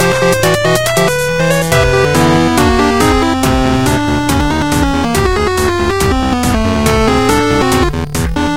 Tiny Chiptune
A short tune i made in LMMS using FreeBoy, Nescaline and some of the preset drumsamples. Almost Loopable.
Music, LMMS, Gaming, Melody, Tune, Sample, Games, Chiptune, Retro